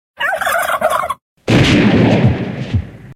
Turkey gobble then shot, Shoot, Gun
from, Hunting, joined, site, sounds, Two